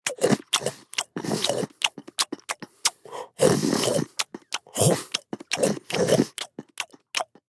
swallow gobble up
a man gobbling up food
chew, eat, eating, food, gobble, gulp, male, meal, person, swallow, up